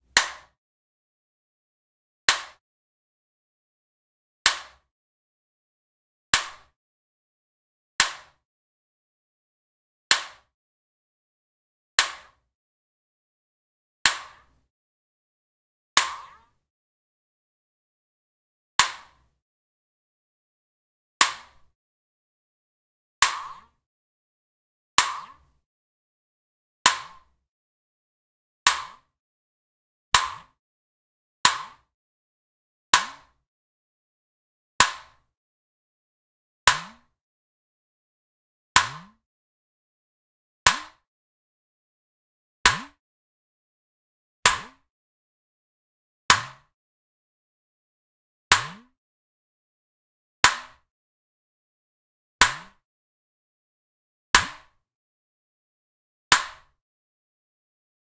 Magnet attracts coins
attracts, coins, impact, magnet, metal, metallic, tin